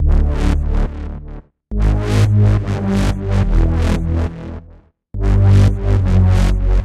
Subtle Sweeper-140bpm
sounds, 8-bit, loops, samples, synth, drums, hit, drum, game, music, video, digital, loop, sample, chords, awesome, melody, synthesizer